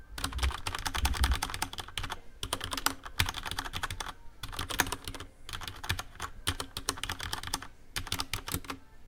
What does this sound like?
Typing quickly on a mechanical keyboard
mechanical, keyboard, typing